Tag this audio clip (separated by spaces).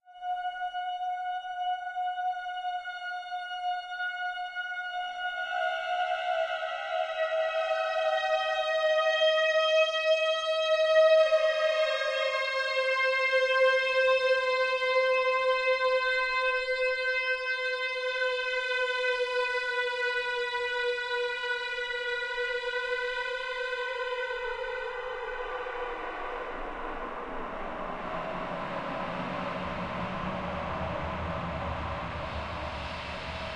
door stretched-sound eerie scary squeak drone processed unsettling artificial horror stretched long